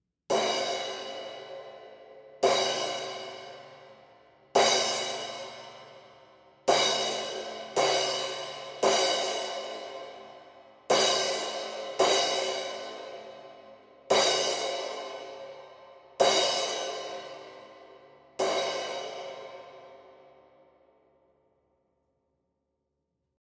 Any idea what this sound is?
Crash Overhead Drum Percussion
Just a little crash overhead recording of my partially fractured crash cymbal
bass, beat, rhythm, loop, cymbal, crash, percussion, drum, metal